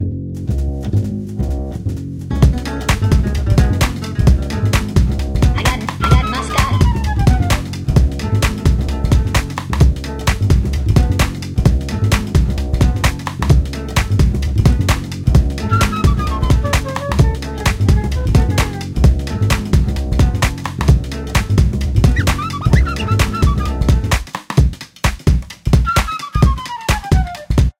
Jazzy Short Sample Experimental Surround
Abstract, Dance, Experimental, Happy, Jazzy, Music, Sample, Short, Surround